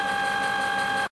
Tank Turret Rotate
Tank turret rotation sound
Recorded printer with zoom h2n
army, attack, explosion, military, rotation, shot, tank, turret, war, warfare